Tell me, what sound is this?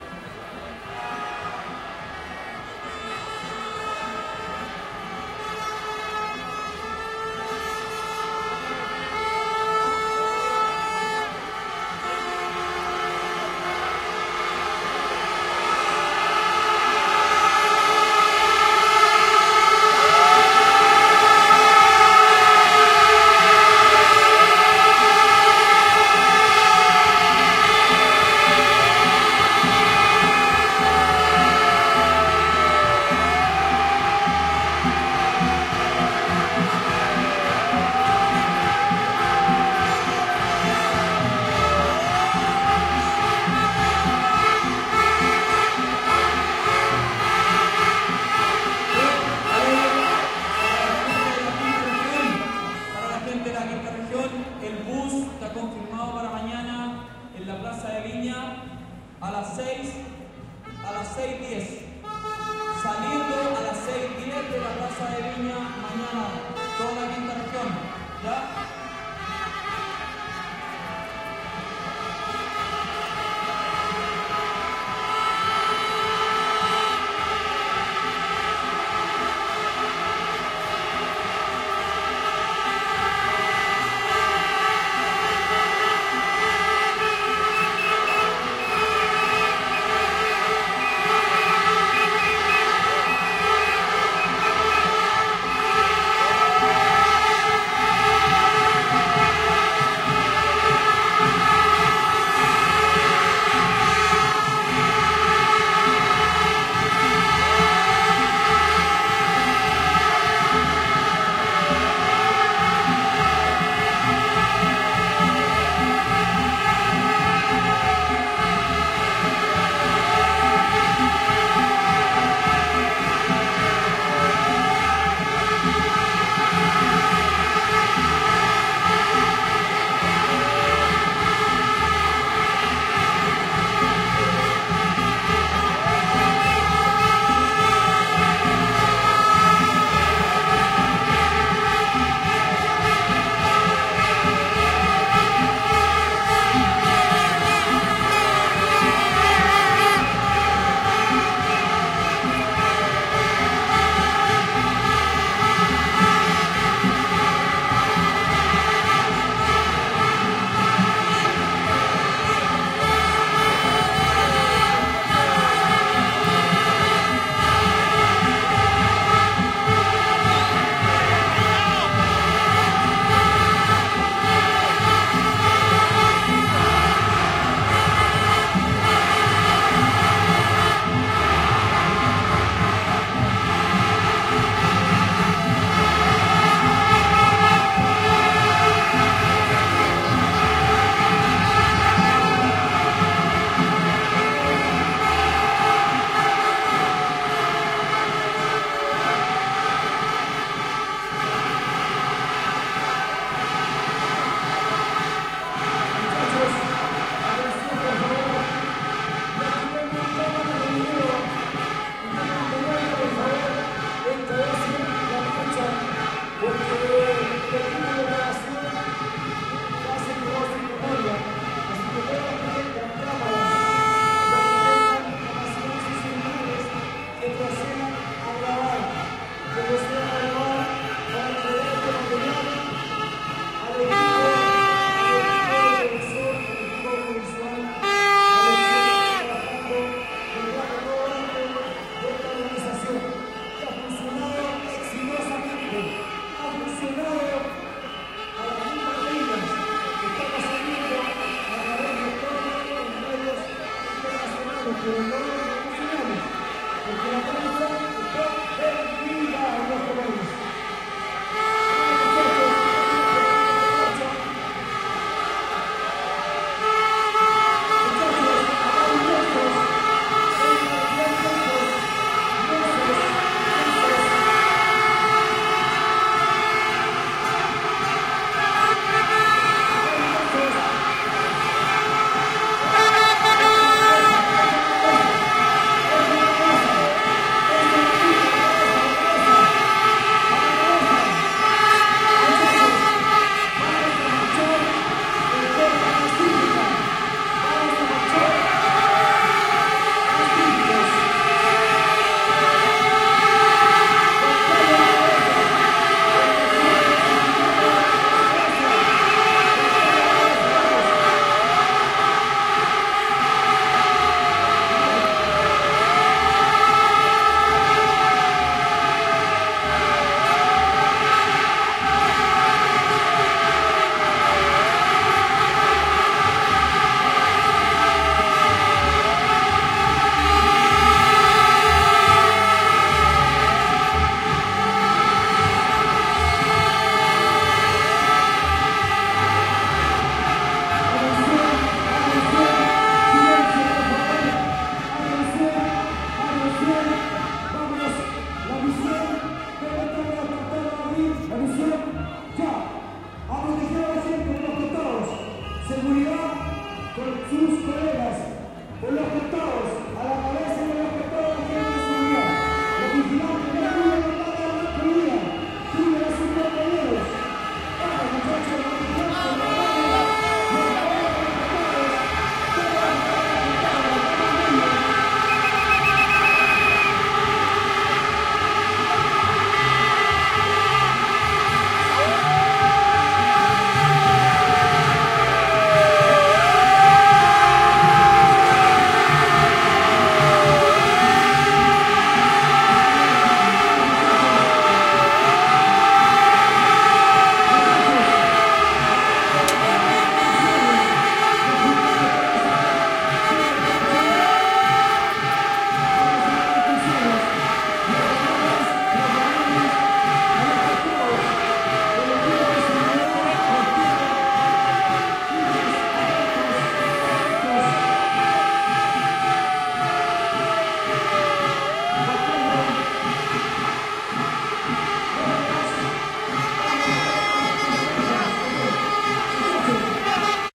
huelga banco de chile 04 - a punto de marchar con batucadas y sirenas
vuvuzelas in crecendo
sirenas de megáfono
pitos
batucada
animador avisa el comienzo de la marcha
se tapa en vuvuzelas
banco, crowd, huelga, people, protesta, santiago, sirenas